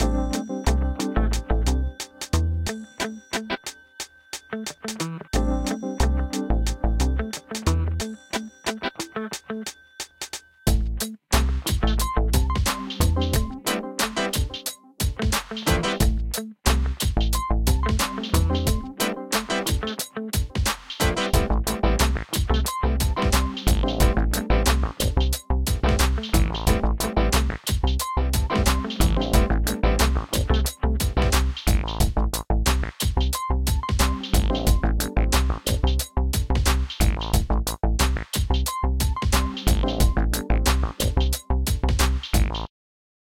Smooth intro
heres a little theme tune i made, it could be like a quick intro for a short web series or anything like that.
theme, music, tune, short